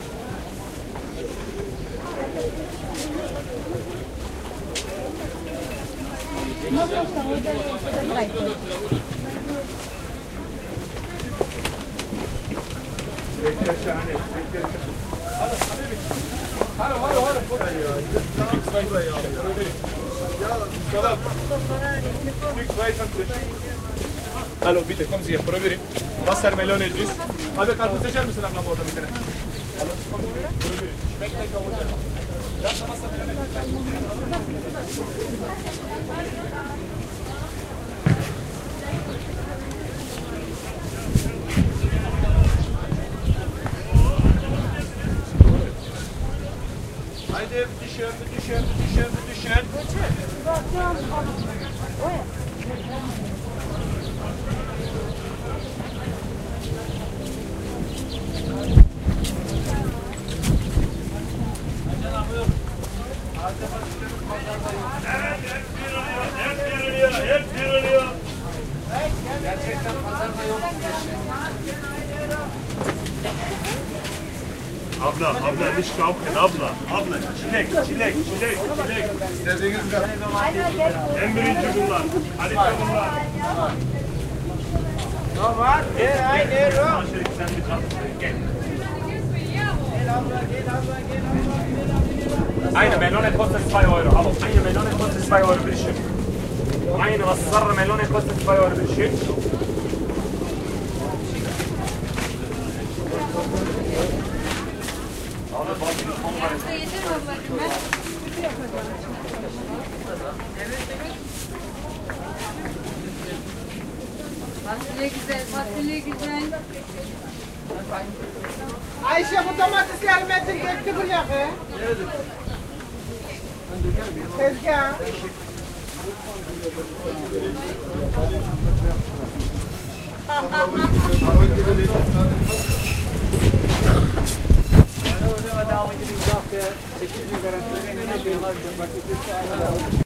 Berlin-Market1
A walk through the market. Birds chirping, steps, Plastic bags rustling, Turkish/Kurdish/Arabic Marketers shouting in German and Turkish etc. "Wassermelone billig (cheap Watermelons!)", motorsound of an old airplane ( Douglas DC-3, a so called Raisin-Bomber from after WWII, which is a Berlin Tourist Attraction) and Helicopter rattling in the sky. Turkish Women chatting, laughter. A Butcher's Saw.I recorded this sample on May 19th 2006 in Berlin Kreuzberg at the Maybachufer-Market on a SONY Minidisc MZ-R35 with a SONY ECM-MS907 Stereo (120 degrees) Microphone.